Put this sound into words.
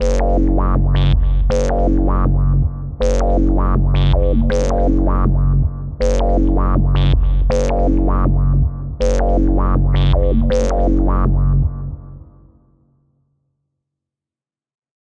DnBbassline160bpm4
Dark, acidic drum & bass bassline variations with beats at 160BPM
160bpm, beat, lfo, bassline, dnb